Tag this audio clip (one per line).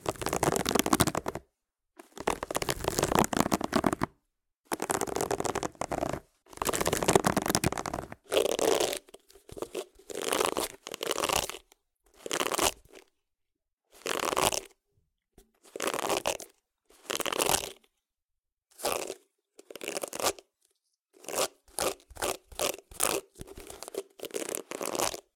Foley
hairbrush
hygiene
morning-routine
onesoundperday2018